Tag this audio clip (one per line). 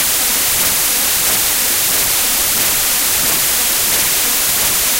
noise
stereo
white